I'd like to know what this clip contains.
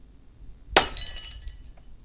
breaking one glass
breaking, glass